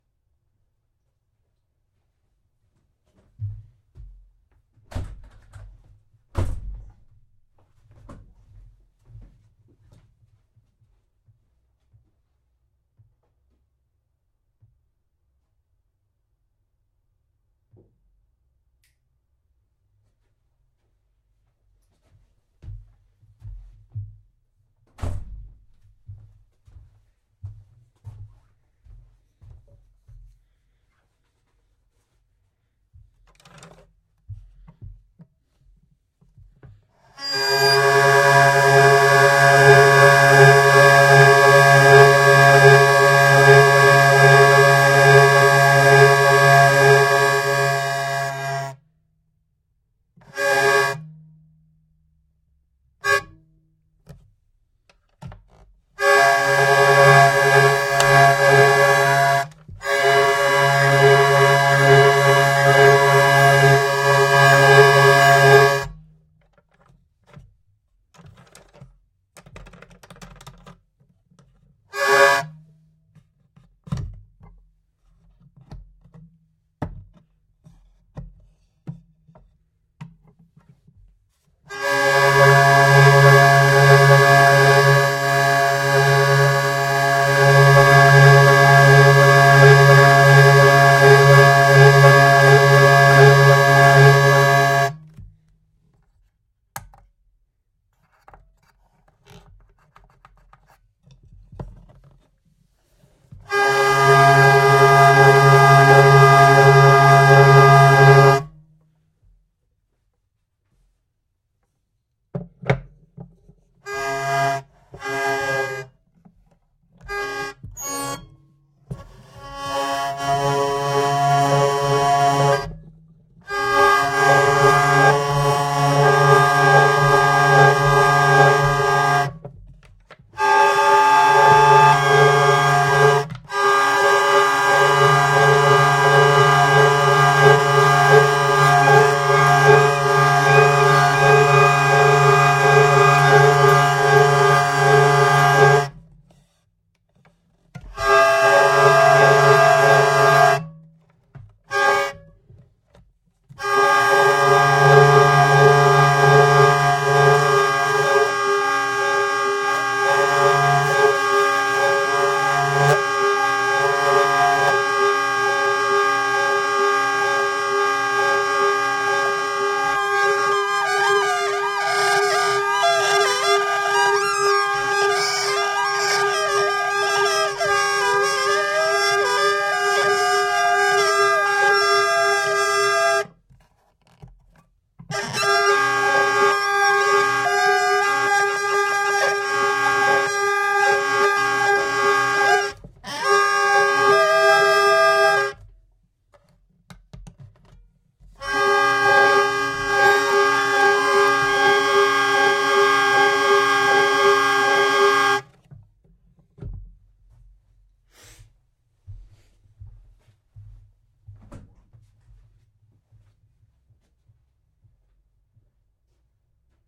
Hurdy Gurdy Textures 01
Free hurdy-gurdy playing with no specific tuning or melody in mind. Tuning peg and some other noises also recorded.